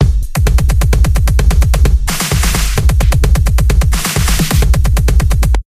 hip op 11
sample sound loop
beat, dance, disko, Dj, hip, hop, lied, loop, rap, RB, sample, song, sound